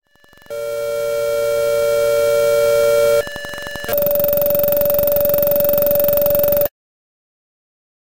Dial Tone for a Phone (sci-fi edition)
This is a dial tone that I made in Logic. Enjoy =)